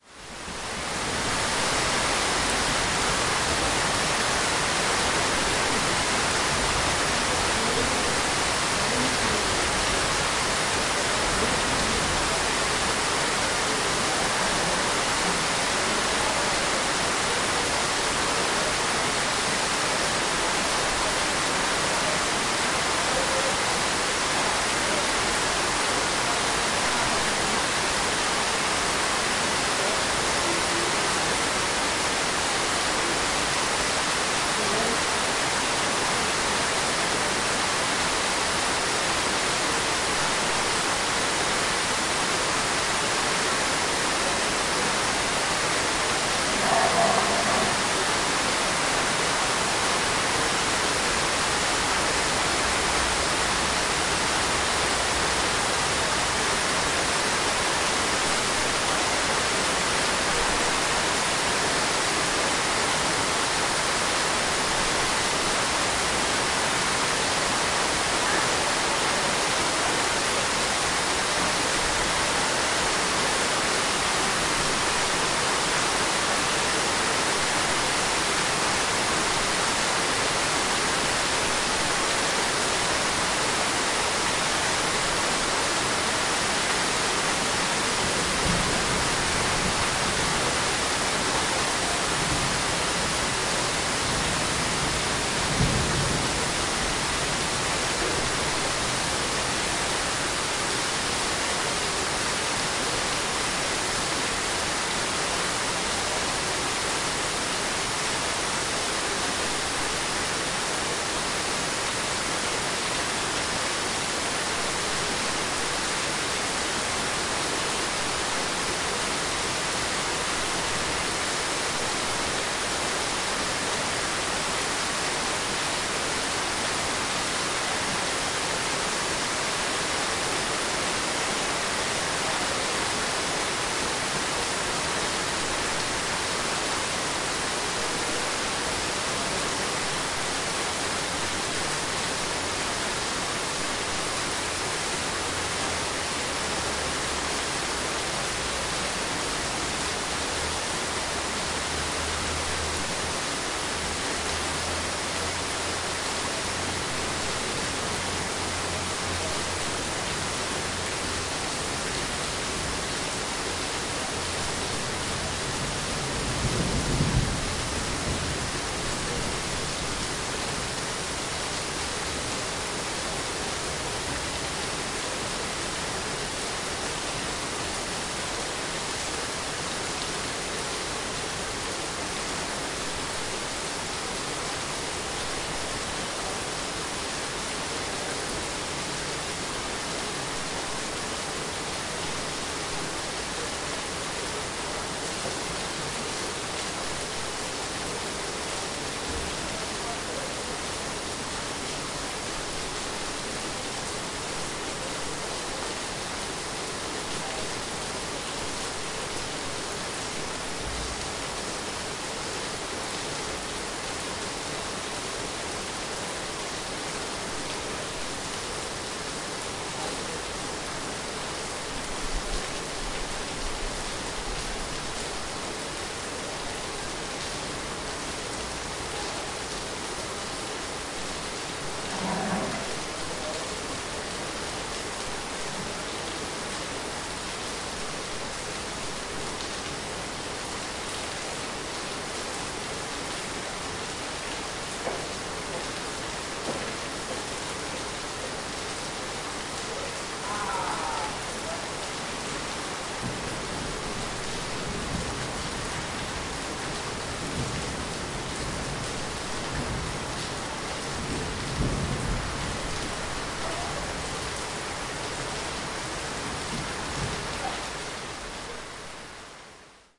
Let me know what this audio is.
Heavy rain in a backyard with people talking
Recorded from balcony of an old house in a city. Apart from the heavy rain you hear people talking from other balconies.
Recorded in Basel, Switzerland.
fieldrecording, soundscape, rain, weather, raindrop, ambiance, raining, ambient, atmosphere, field, ambience, recording, water, drip, wet, drops, field-recording, storm, dripping, raindrops, nature